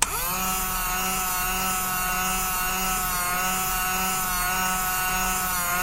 change counter2
Recording of automatic change counter recorded direct with clip on condenser mic. From top without coins.
change, machine